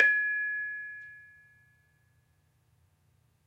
BONANG BPPL5h
CASA DA MÚSICA's VIRTUAL GAMELAN
The Casa da Música's Javanese Gamelan aggregates more than 250 sounds recorded from its various parts: Bonang, Gambang, Gender, Kenong, Saron, Kethuk, Kempyang, Gongs and Drums.
This virtual Gamelan is composed by three multi-instrument sections:
a) Instruments in Pelog scale
b) Instruments in Slendro scale
c) Gongs and Drums
Instruments in the Gamelan
The Casa da Música's Javanese Gamelan is composed by different instrument families:
1. Keys
GENDER (thin bronze bars) Penerus (small)
Barung (medium) Slenthem (big)
GAMBANG (wooden bars)
SARON (thick bronze bars) Peking (small)
Barung (medium) Demung (big)
2. Gongs
Laid Gongs BONANG
Penerus (small)
Barung (medium) KENONG
KETHUK KEMPYANG
Hanged Gongs AGENG
SUWUKAN KEMPUL
3. Drums
KENDHANG KETIPUNG (small)
KENDHANG CIBLON (medium)
KENDHANG GENDHING (big)
Tuning
The Casa da Música's Javanese Gamelan has two sets, one for each scale: Pelog and Slendro.